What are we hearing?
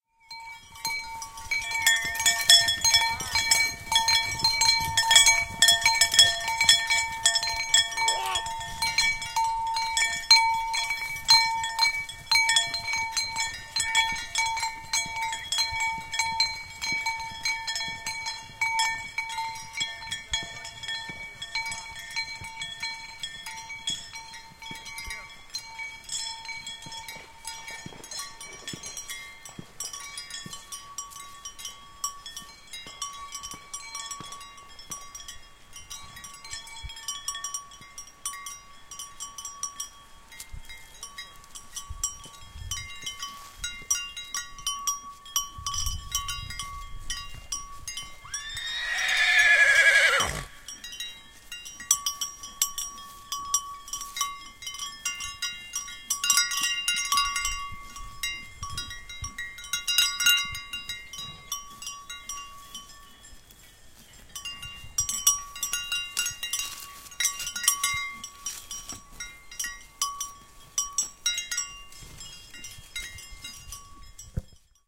horse's bell
This sound is recorded in the Altai mountains in the parking lot of horses. On the neck of horse bells. It helps to find them.
Used 2-ch surround. Naturally reverberation and delay from mountains!
field-recording, horses, mountains